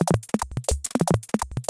processed with a KP3.
breakbeat, fast, glitch, processed